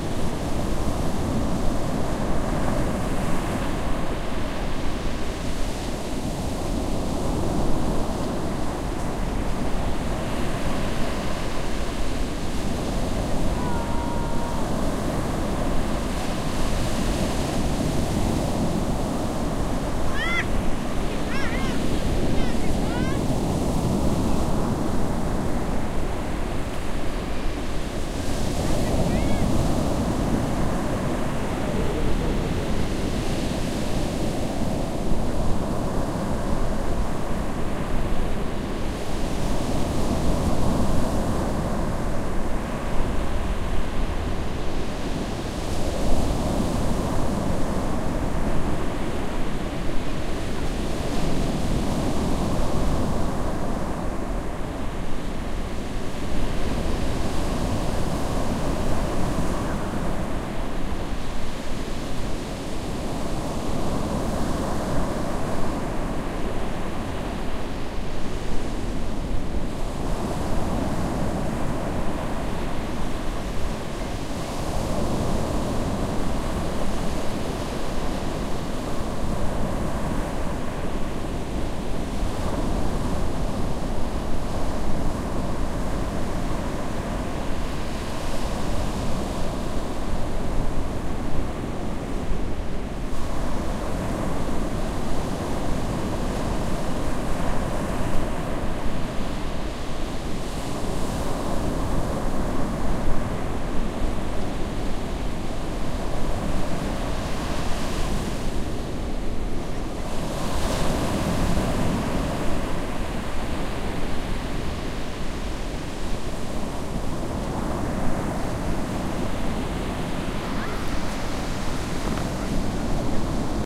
Heavy waves in Henne
A short recording to test my wind shield in heavy wind at the beach. It's in Henne, Denmark a windy night. Lots of people and waves. Sony HI-MD walkman MZ-NH1 minidisc recorder and two Shure WL183